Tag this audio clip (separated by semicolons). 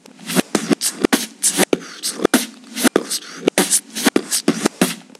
beat; beatbox; percussion